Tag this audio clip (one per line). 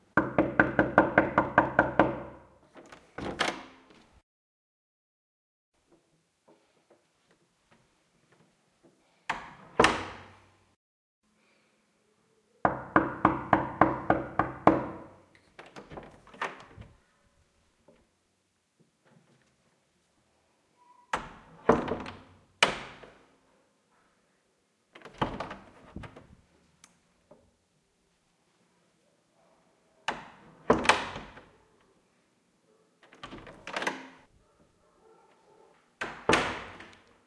bedroom
close
house
knock
wood